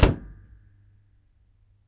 closing washing machine 03
The sound of closing the door of my washing machine.
closing-laundry-dryer, stereo, home, clothes, door, field-recording, bathroom, closing-tumble-dryer, tumble-dryer, washing-machine, ambient, closing-washing-machine, laundry-dryer, closing, furniture